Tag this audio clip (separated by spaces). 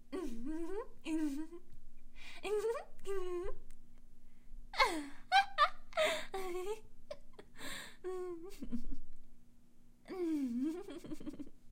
chortle crazy creepy cute female giggling Girl laughter voice woman